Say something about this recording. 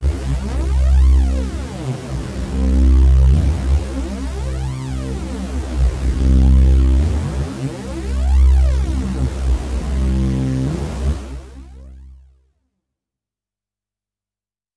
Dirty Phaser - C2
This is a sample from my Q Rack hardware synth. It is part of the "Q multi 008: Dirty Phaser" sample pack. The sound is on the key in the name of the file. A hard lead sound with added harshness using a phaser effect.
electronic, multi-sample, synth, hard, phaser, lead, waldorf